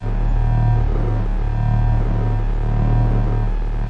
Didgeridoo Didjeridu Low Dark Distroted Mega Sub Bass Large Big Machinery Under Ground Mega Massive Distortion Evil Dark Horror The Purge Scary Movie 4 - Nova Sound
Background, Blow, Dark, Didgeridoo, Drill, Drilling, Ground, Horn, Horor, Horror, Large, Low, Machine, Purge, Scare, Scared, Scarey